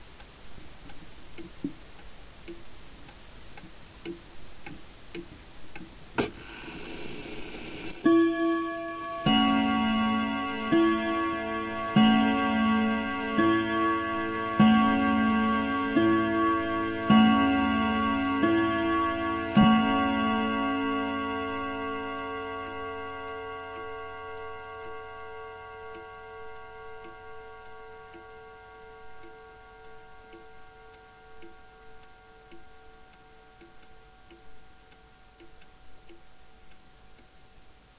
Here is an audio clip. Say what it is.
Old wall clock
Old English wall clock beats 5 o'clock with brass hammers on steel strings